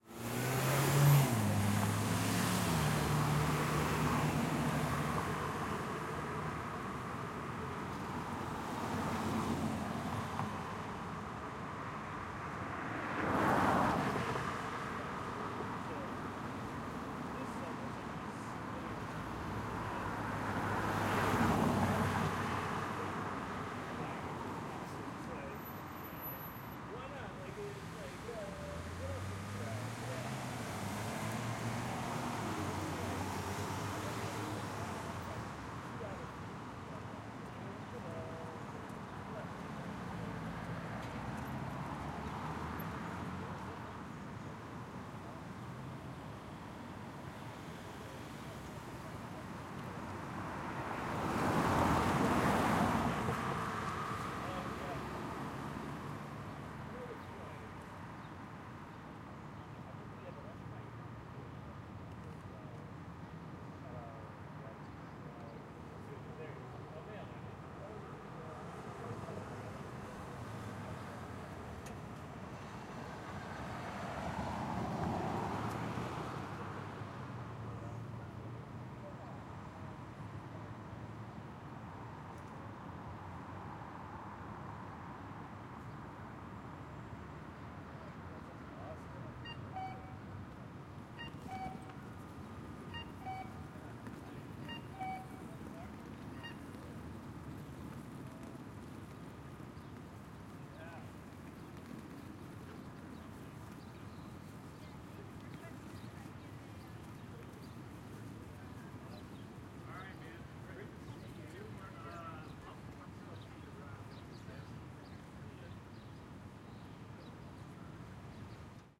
7 - Forbes & Morewood Intersection - Trk-10 South
Field Recordings from May 24, 2019 on the campus of Carnegie Mellon University at the intersection of Forbes and Morewood Avenues. These recordings were made to capture the sounds of the intersection before the replacement of the crossing signal system, commonly known as the “beep-boop” by students.
Recorded on a Zoom H6 with Mid-Side Capsule, converted to Stereo
Editing/Processing Applied: High-Pass Filter at 80Hz, 24dB/oct filter
Recorded from the south side of the intersection.
Stuff you'll hear:
Car bys (throughout, various speeds)
Quiet voices walla
Quiet birds
Crossing signal (1:29)
Footsteps
Person rolling suitcase
beep, birds, boop, bus, campus, car-by, Carnegie-Mellon-University, cars, CMU, crossing, field-recording, intersection, outdoors, outside, Pittsburgh, signal, street, summer, traffic, voices